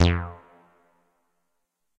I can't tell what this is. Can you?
MOOG BASS SPACE ECHO F
moog minitaur bass roland space echo
space, moog, roland, minitaur, echo, bass